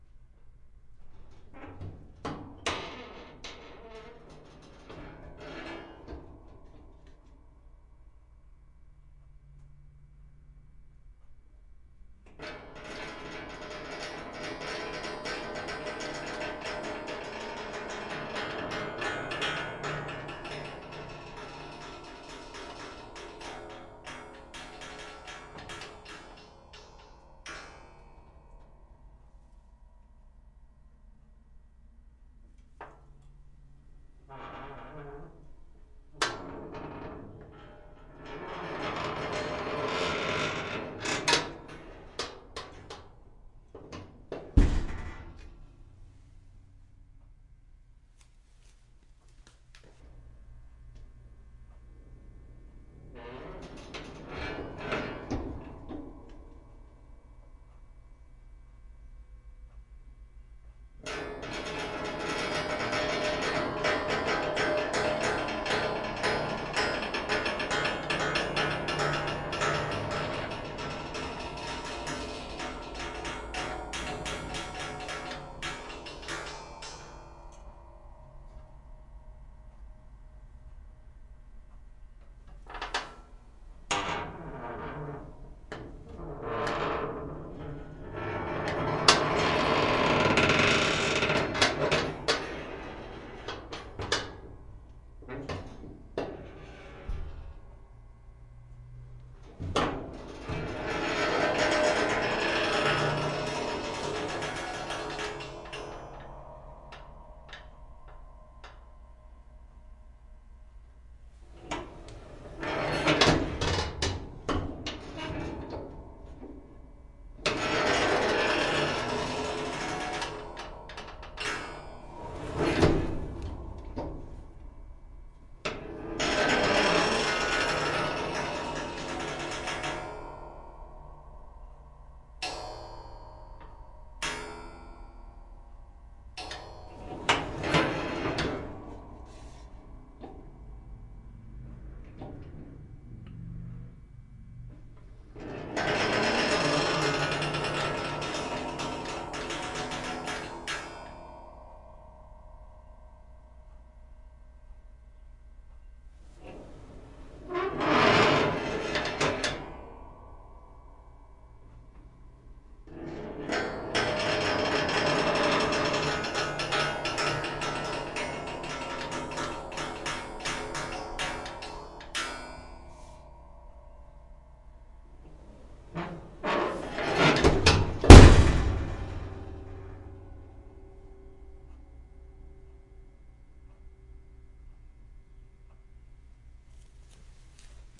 The sound of a metal kitchen oven door being opened various times and speeds. There is a metallic squeak to the sound (like it needs WD-40 or something).
broiler, door, household, oven, pan